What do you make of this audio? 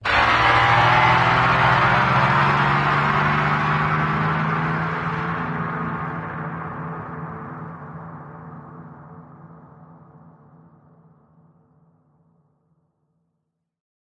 electronic riser mono
Electronic Riser, made by pitch bending guitar.